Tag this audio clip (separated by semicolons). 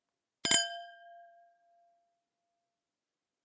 cheers; cling; clink; clinking; field-recording; glass; glasses; toast; wine; wine-glass